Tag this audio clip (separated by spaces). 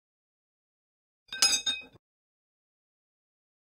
clang hit iron metal metallic percussion steel ting